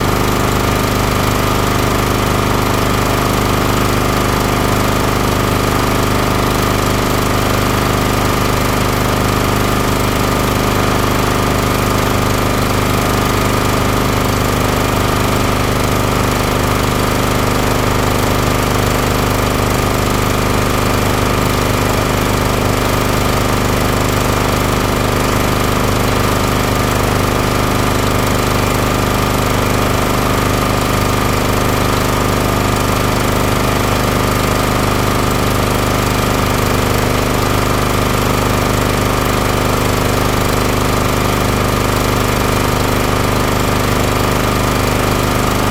Diesel Power Generator
A generator that was standing next to a S-Bahn Station last night in Berlin.
Recorded with Zoom H2. Edited with Audacity.